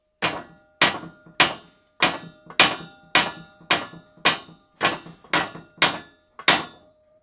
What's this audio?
Steel On Steel Impacts Many
Metal, Friction, Hit, Plastic, Tool, Impact, Tools, Crash, Steel, Bang, Boom, Smash